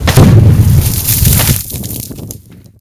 A "Thunder King" near a well, laying upside down. Tons of water coming up when explodes.
(No illegal fireworks have been used or modified)